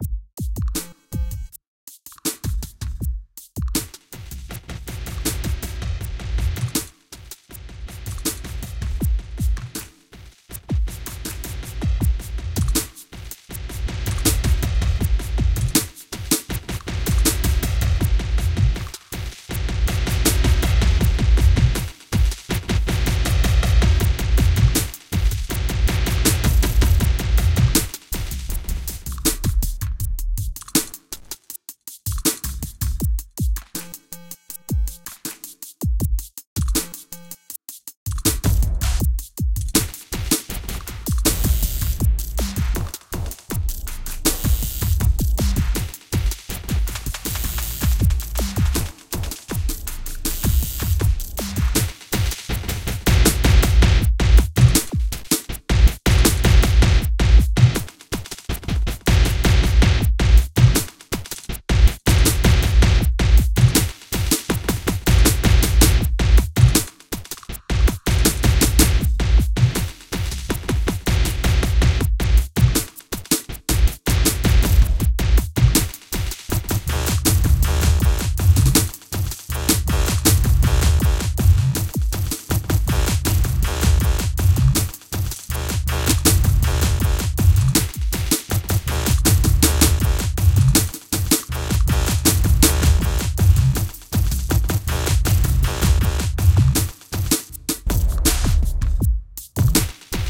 Cloudlab-200t-V1.2 for Reaktor-6 is a software emulation of the Buchla-200-and-200e-modular-system.